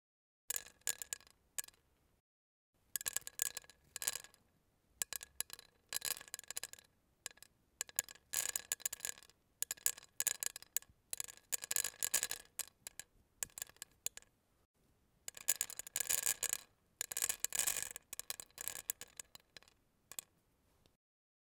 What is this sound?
piedrecitas cayendo 2
caida, ceramica, close-up, drop, little, lluvia, piedra, piedrecita, rain, stone